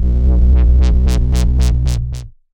Lettre A Junglise
A good bass to make your tracks sound like drum'n'bass or dubstep. 170bpm